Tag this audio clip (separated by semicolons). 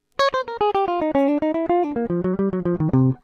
funk; fusion; groovie; jazz; jazzy; licks; pattern